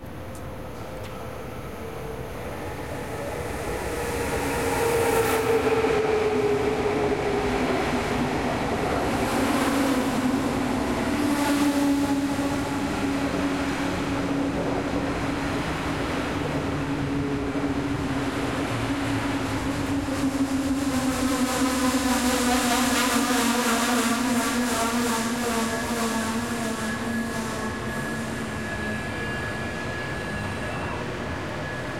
trein aankomst 2021
field-recording on a railway platform in 2021 in Belgium. A train is arriving, it glides on the tracks, brakes and comes to a standstill.
railroad, rail-road, rail, platform, stopping, arriving, field-recording, railway, iron, ride, vibrations, track, train-station, rail-way, glide, train, trains, electric-train, slide, train-track, station, brakes, stop, wheels, passenger-train, riding